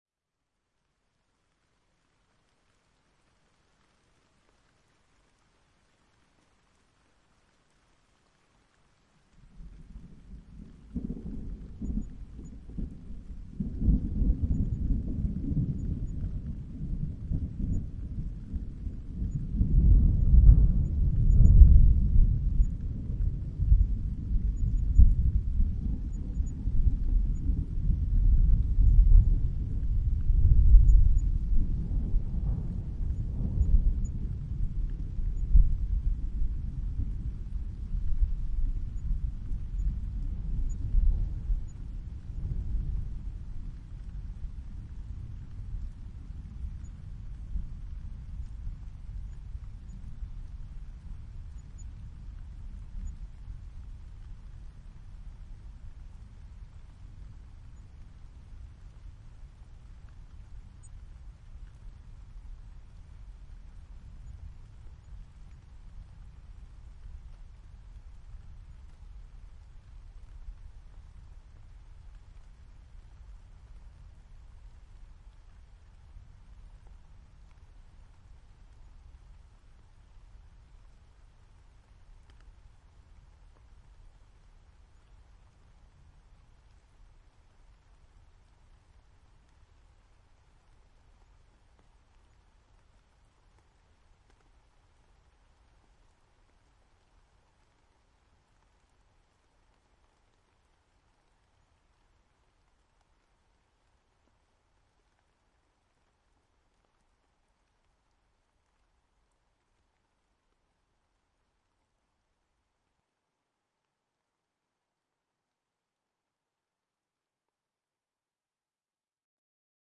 The thunder is slow downed to 0,7speed and has now very deep frequencies, which can shake your house, if you have the right equipment to play the file.
The 4 channels are recorded in IRT-cross technique so it is a 360° record.
CH1(left) = FL+RL
CH2(right) = FR+RR